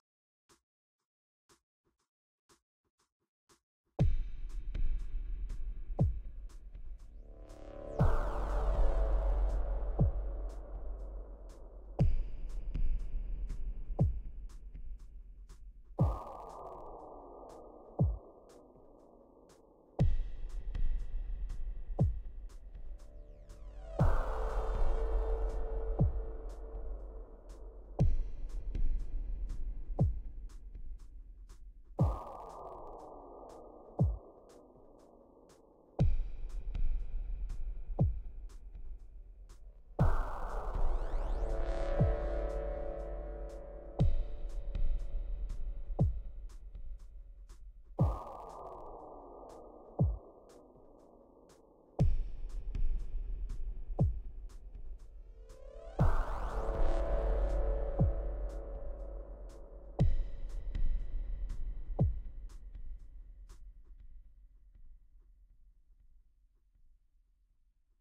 Ambiance for sneaking around in a video game
Game, Ambient, Suspense, Sneaking, Video, Stealth